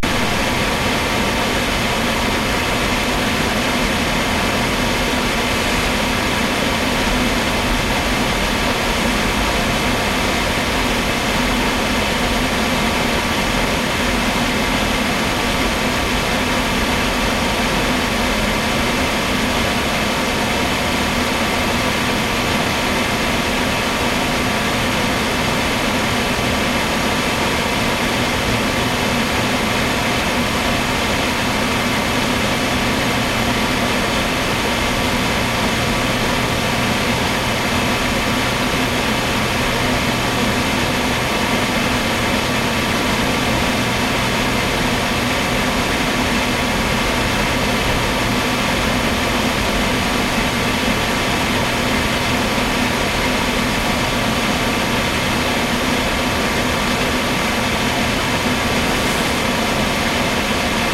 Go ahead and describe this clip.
4 3 07 Computer Fan
Between my hot running Pentium 4 3ghz and ATI Radeon video card, my computer gets a tad loud. This recording has the volume upped a bit, but you can get the point. Recorded with my Sony MZ-N707 MD and Sony ECM-MS907 Mic.